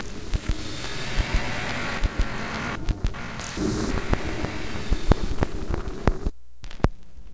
2-bar dark ambient pad that rises and falls in pitch combined with a
rhythmic click/glitch in the 2nd half of the bar; made with Ableton
Live and Adobe Audition